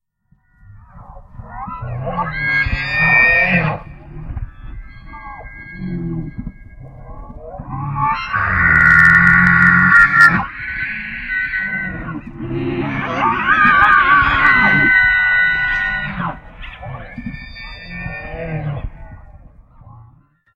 Nightgaunt screams
I took an elk's mating calls and manipulated them to sound like a monster scream. I use this for Nightgaunts in our podcast Ballad of the Seven Dice.
abberation, horror, lovecraft, monster, nightgaunt, scream